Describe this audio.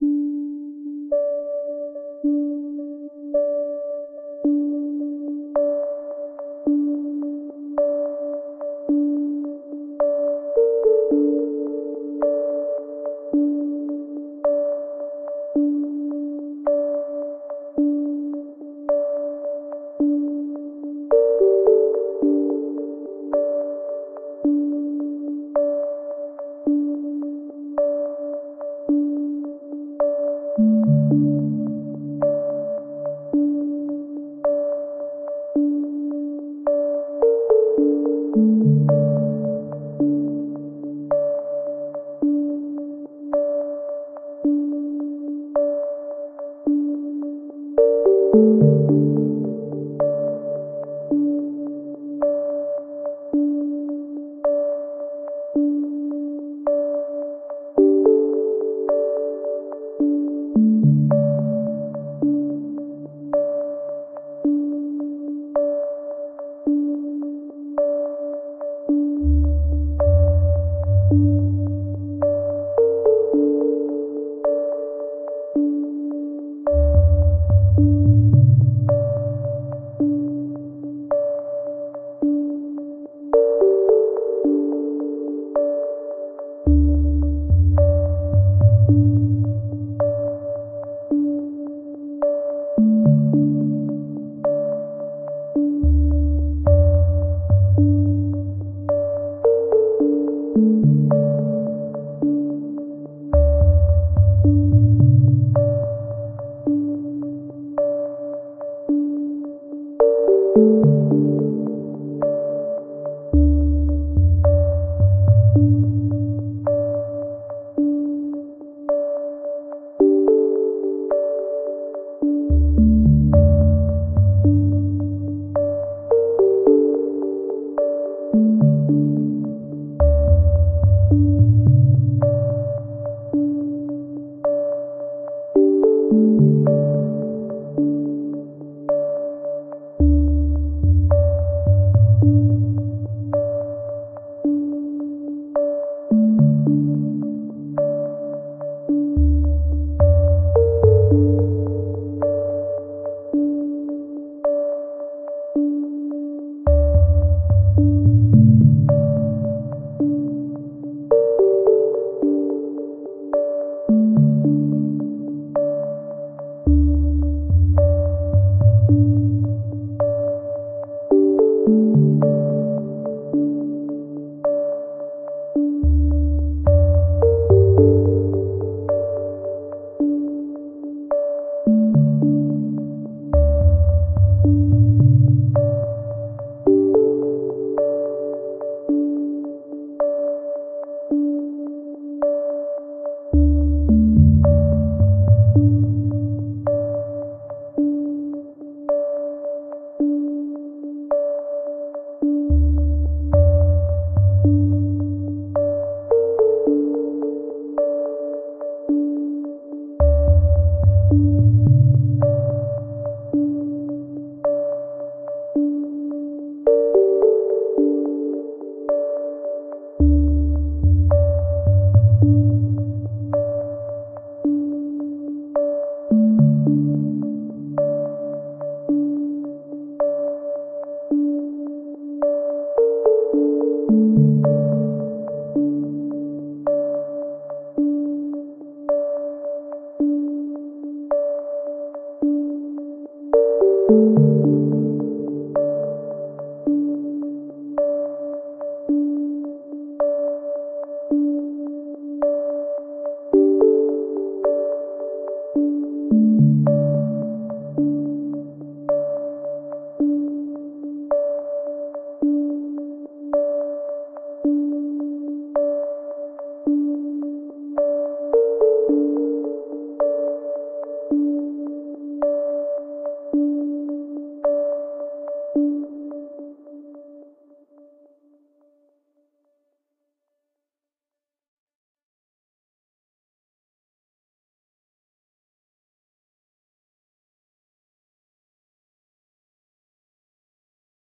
Project Nine - Time is of the Essence: Minimalist Tune
"Project Nine" is a sparse yet melodic minimalist tune that was written to be used in sequences where time is of the essence.
Thank you for listening.
APPLY THE FOLLOWING CREDIT IF THIS TRACK IS USED IN YOUR PRODUCTION:
📜 USAGE RIGHTS AND LIMITATIONS:
🎹 ABOUT THE ARTIST:
Creatively influenced by the likes of Vangelis, Jean Michel Jarre, KOTO, Laserdance, and Røyksopp, Tangerine Dream and Kraftwerk to name a few.
First and foremost, I would like to show my gratitude to you! My music would be meaningless if it weren't for you.
Take care, and I hope you enjoy this composition!
atmospheric, synth-pads, soundscape, lo-fi, synth, minimalist